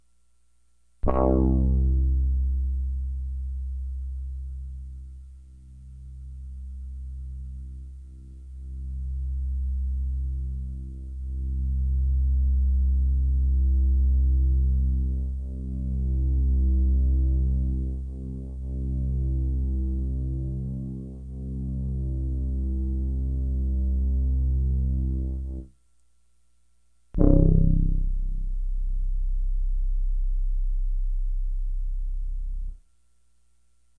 Brass flange drone 2 tones